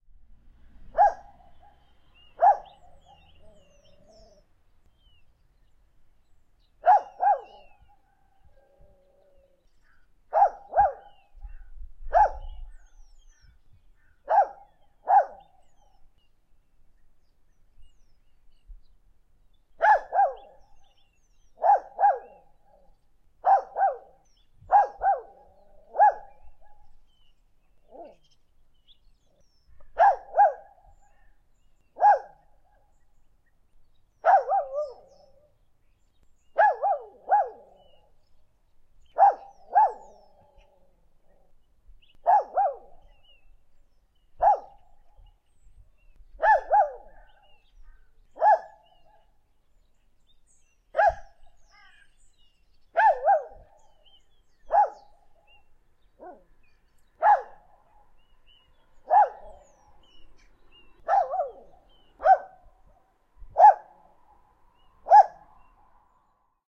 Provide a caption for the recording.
Dog Barking, Single, A
My neighbour's dog never stops... so I finally decided to get something out of it - here is a snippet. The barks have a natural echo from the surrounding countryside, and some nearby birds were chirping. I have applied occasional EQ to reduce the bass frequencies. A little bit of handling noise.
An example of how you might credit is by putting this in the description/credits:
The sound was recorded using a "H1 Zoom recorder" on 25th October 2017.
bark, barking, dog, growling